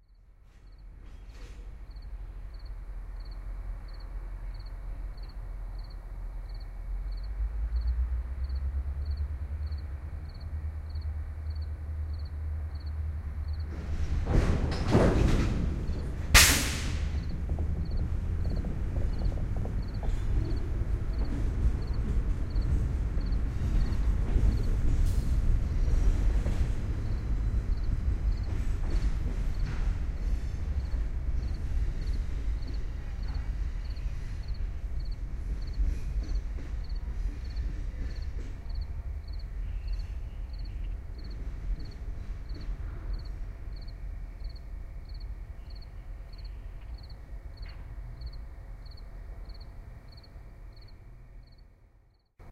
Train at Ford Plant 2
Stereo recordings of a train in the train yard at the Ford Assembly Plant in St. Paul, MN. Recorded with a Sony PCM-D50 with Core Sound binaural mics.
engine, industrial